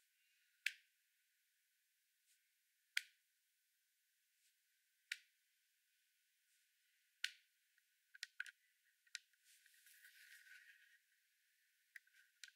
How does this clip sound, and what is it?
Recorded on an Iphone 6, inside a small room.
Click, Iphone, Short